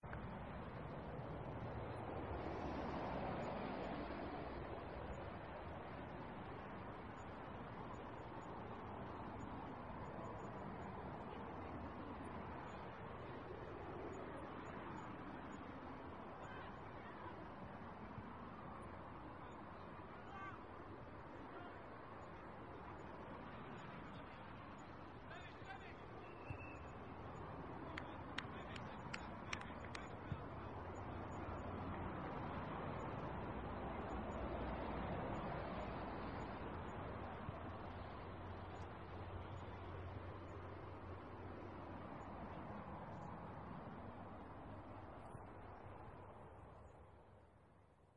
traffic & players atmos 2
field-recording, football, shouts